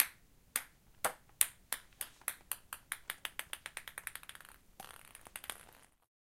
Balle Ping-Pong 2
Ping Pong Ball
Pong, Ping